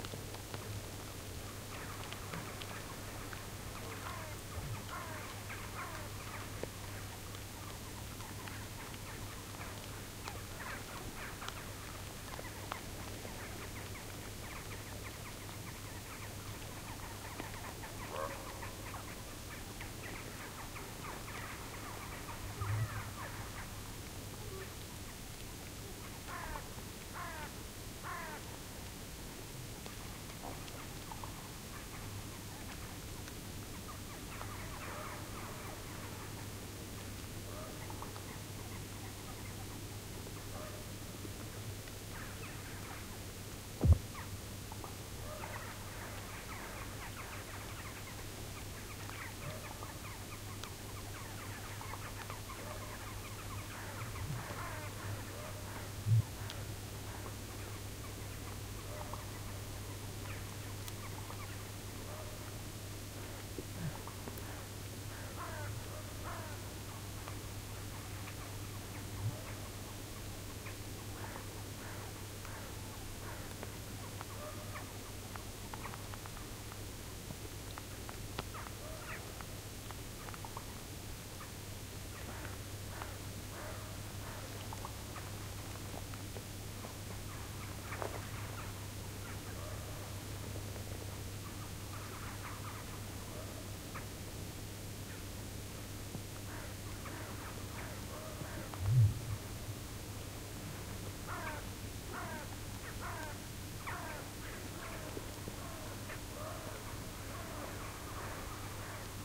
Sweden - Frozen Lake Ambience
You can even hear a low thud of something happening under the ice.